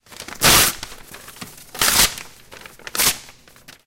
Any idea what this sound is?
paper break
sound produced by break down a paper. This sound was recorded in silence environment and close to the source.
break, campus-upf, paper, UPF-CS13